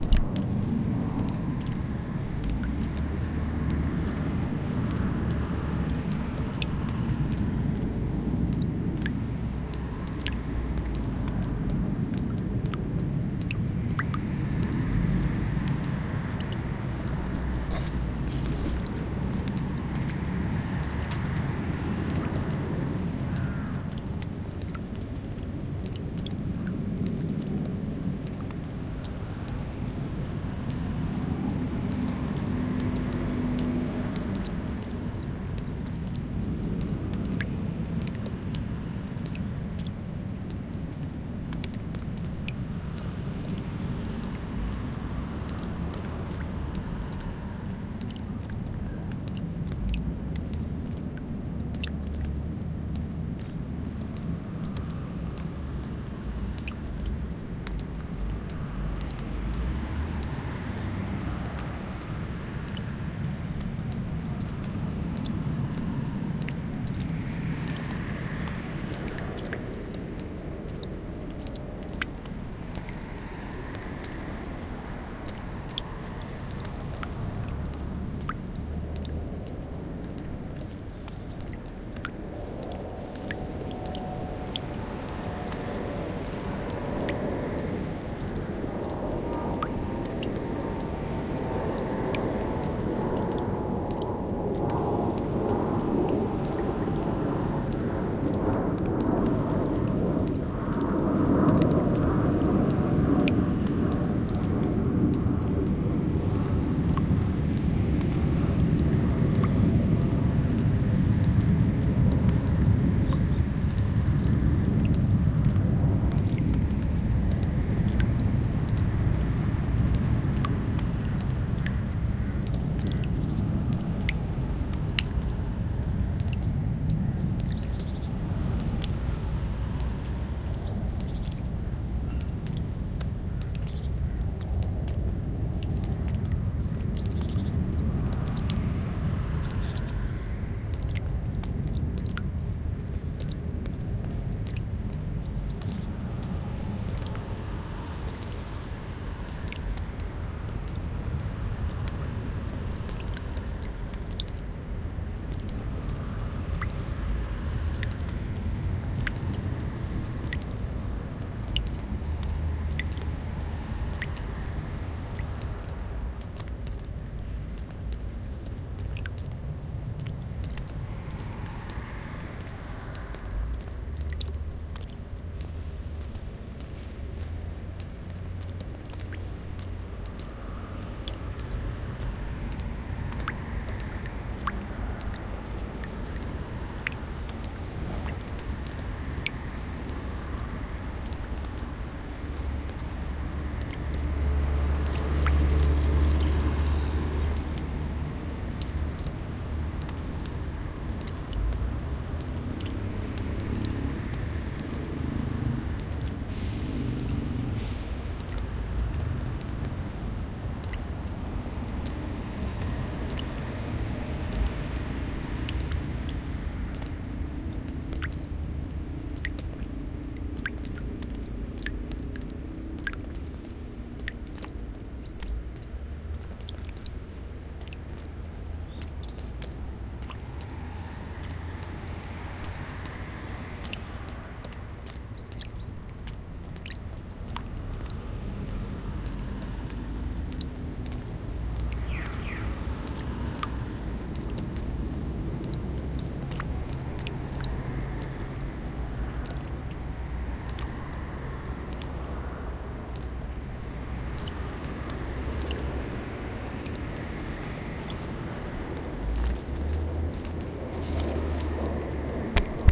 Melting snow dripping from trees - in a quiet garden-suburb environment. Cars, passing airplane and some birds in background.
trees, melting